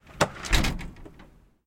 Winter - Closing Metal Screen Door
Closing a metal screen door through the snow. I'm not picky; I just want to see what this is used in.
metal, opening, screen, windows, rusty, creak, old, door, open